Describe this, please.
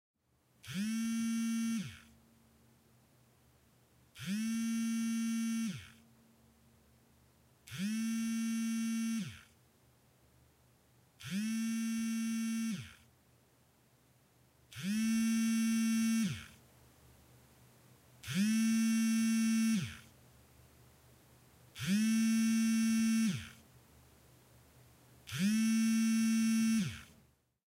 The vibration from my old beloved Nokia 8210 (rest in peace).
(discovered this recording in the vastness of old hard disks)